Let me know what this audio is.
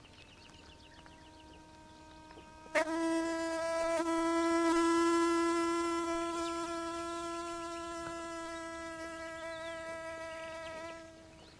mono recording of a bee-fly. Sennheiser ME62 into iRiver H120 / grabacion de un bombílido